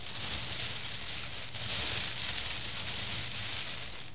Coins Pouring 05
A simple coin sound useful for creating a nice tactile experience when picking up coins, purchasing, selling, ect.
Game, Sell, Video-Game, gamedeveloping, indiegamedev, Gold, indiedev, gamedev, videogame, Realistic, Coin, Coins, gaming, Money, Purchase, Currency, sfx, videogames, games